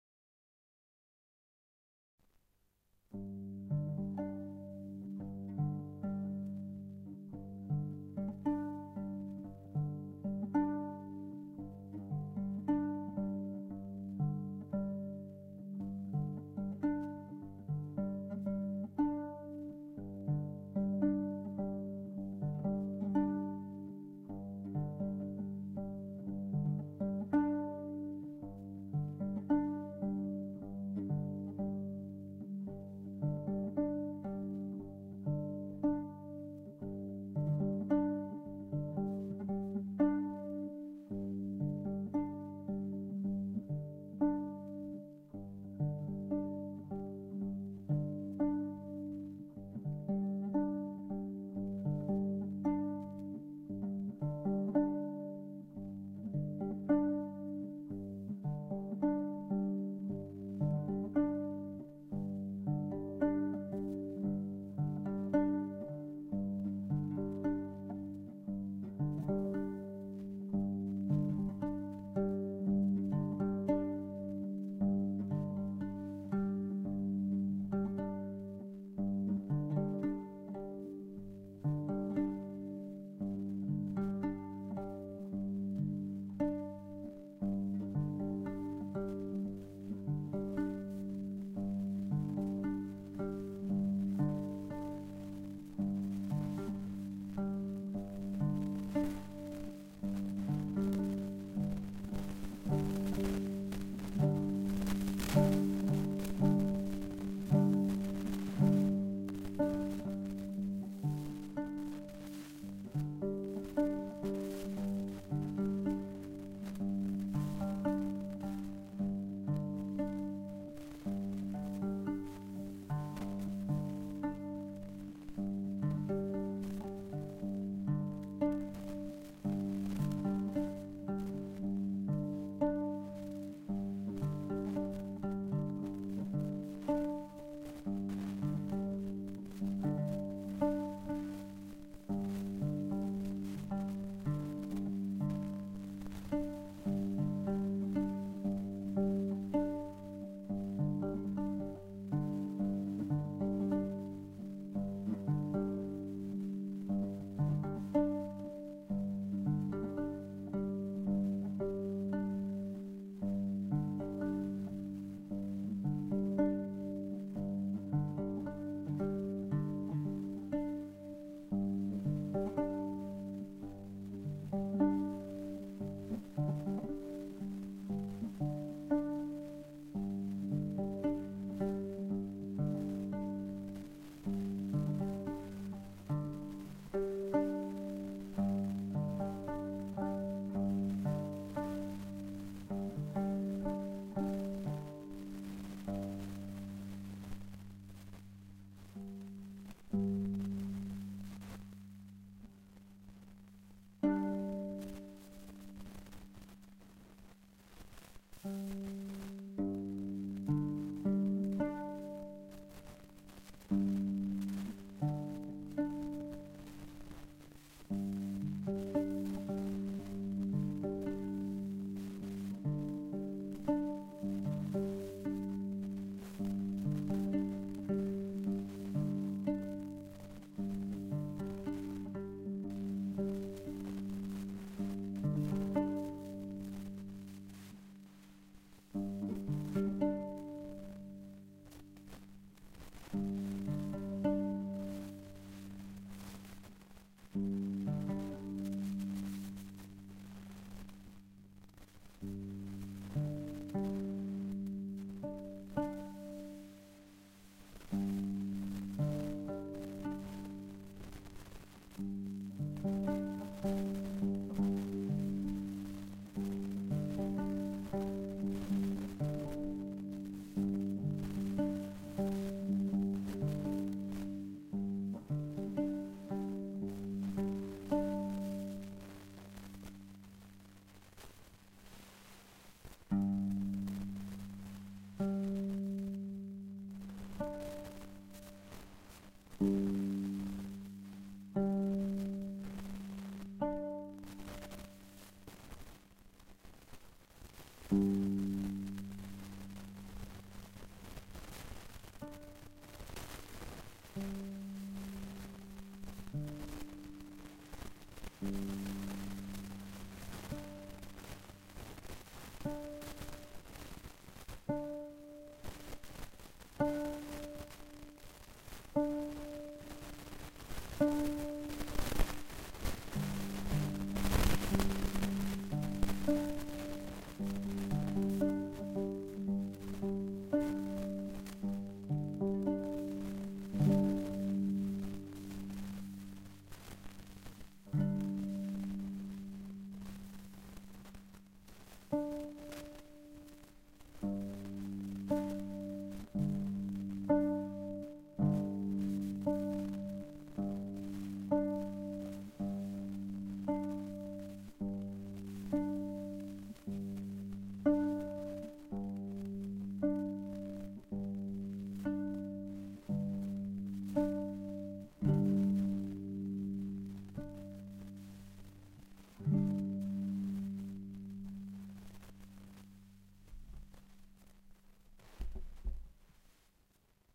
Moroccan Guimbri Lute
ethno, musical, moroccan, string, world, stringed, loutar, acoustic, guimbri, instrument